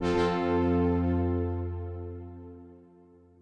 A short fanfare to play when a task is finished successfully. 2 of 3
done, finnish, task